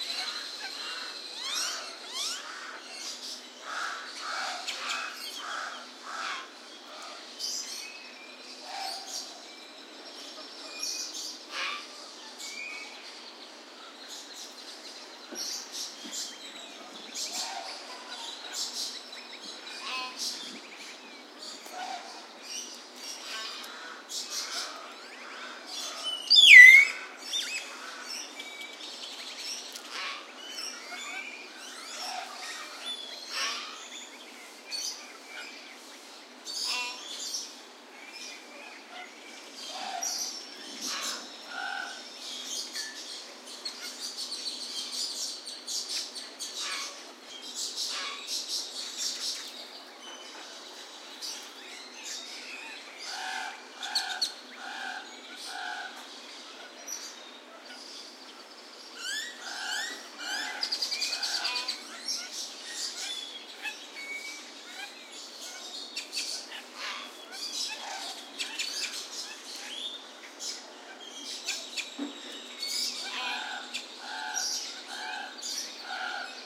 saz birds2
A large number of birds calling, including Pied Crow, White-necked Raven, cranes, grackles, lorikeets, and some close-up calls from a Hill Myna.
tropical, zoo, jungle, myna, birds, aviary, rainforest